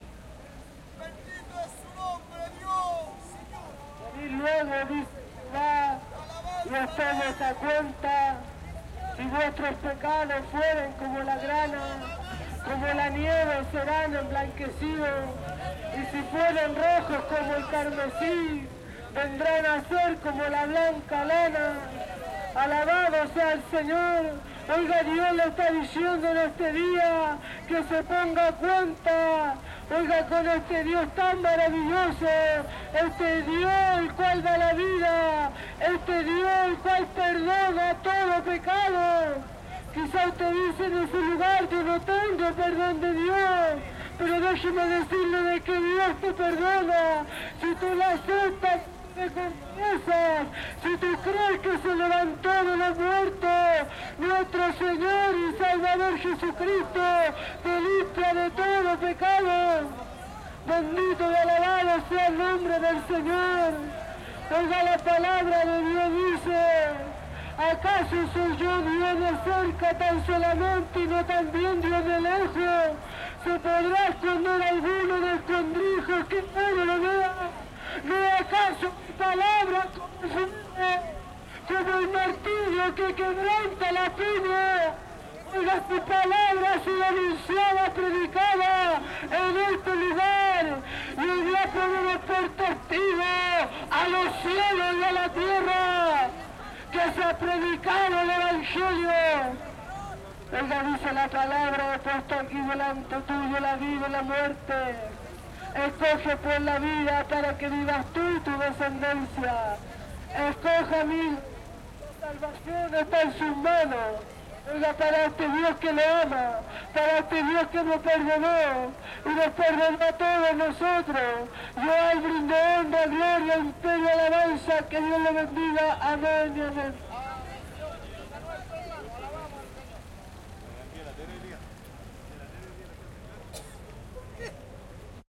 Evangelicos cantando y proclamando en Plaza de Armas, Santiago de Chile, 6 de Julio 2011.
Gospel singers in Plaza de Armas, Santiago of Chile.